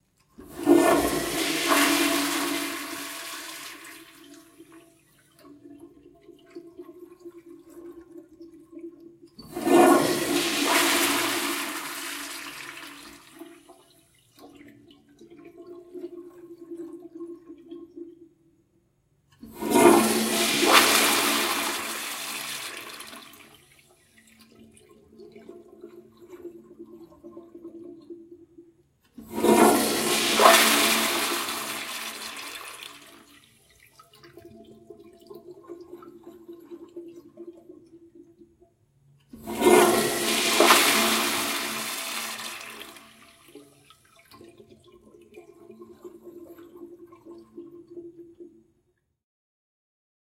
Toilet Flushes
This is a recording of a toilet flushing multiple times.
Effects: noise removal, basic EQ to clean it up